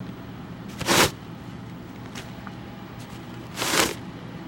pulling a tissue from a box
pull, tissue, tissues